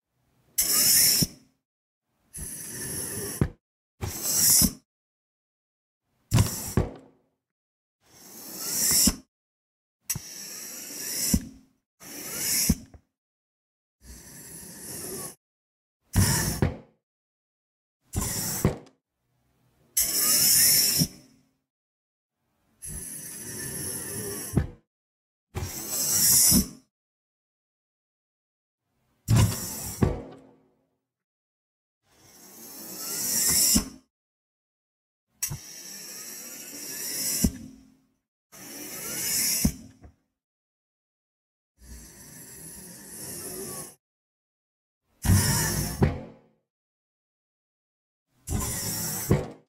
Sounds of a height adjustable chair Recorded and edited in Protools + Roland Quad Capture + AKG CK93 mic.
00:00- Original sounds
00:19- Same sounds but expanded with elastic audio (protools)